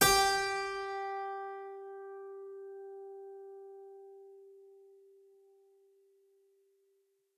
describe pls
Harpsichord recorded with overhead mics